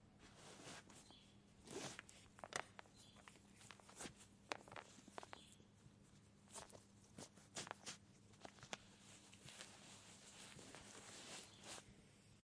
Tying Shoe Laces Edited
This is of someone tying their shoes and the sound that the laces make when tightened and tied.
Tying, Rope, Wind, Soft, Laces, OWI, Free, Swooshes, Subtle, Unique, Hand, Sneakers